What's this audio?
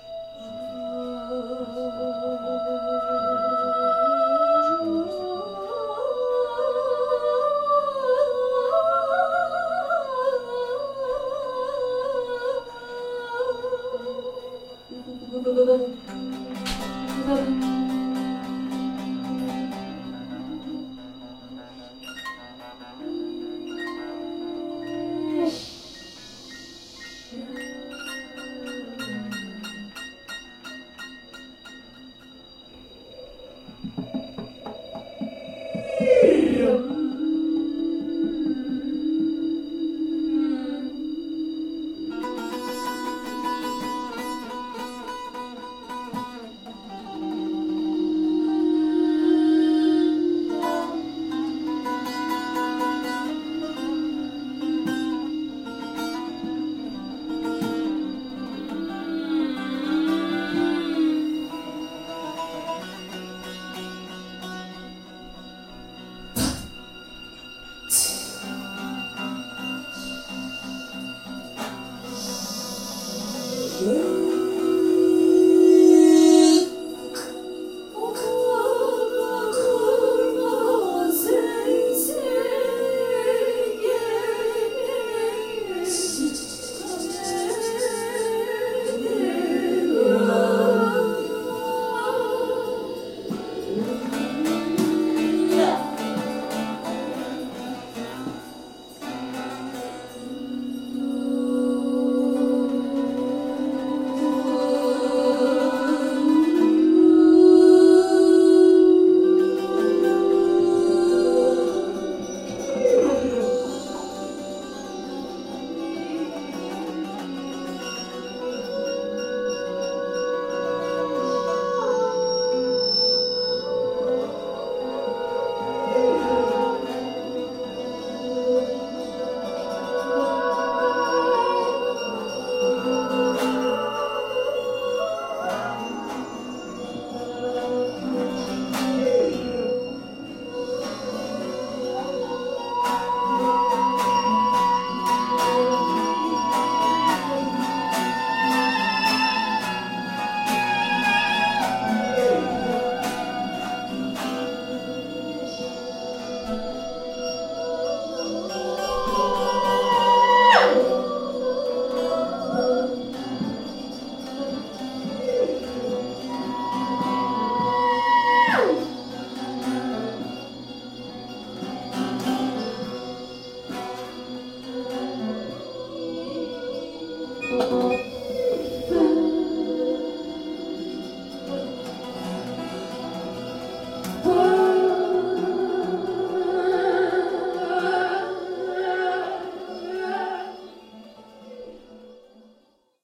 Vocalists in electroacoustic music
This is a recording made in a rehearsal session for an electroacoustic orchestra. The humming of female vocalists is processed to produce an ambient creepy effect.
baglama, creepy, electro-acoustic, geo-ip, humming, vocal